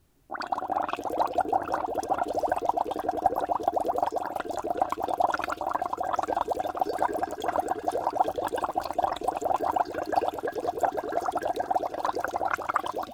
Bubbling Water
Water bubbling by blowing throw a straw